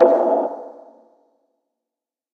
synth dark wood
hit snare thing
electronic, hit, percussion, snare